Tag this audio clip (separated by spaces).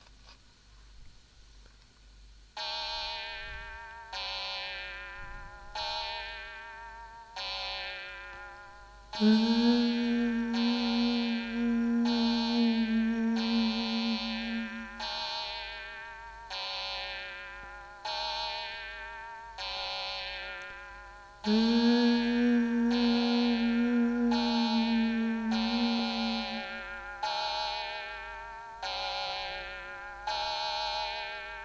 feelings emotions